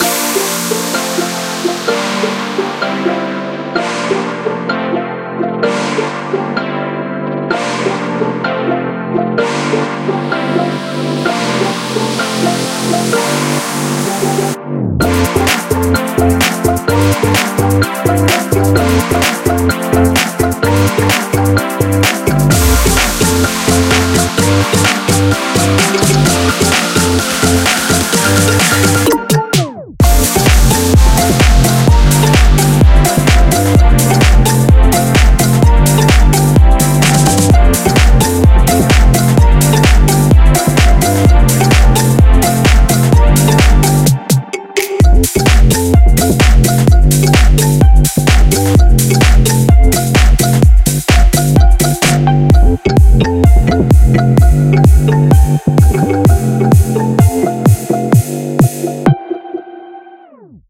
This track was created using Fl Studio 20
BPM 128
KEY F major
Celesta, Dance, EDM, Electro, E-piano, Hawaii, House, Kalimba, Mood, Ocean, Sea, Summer, Tropical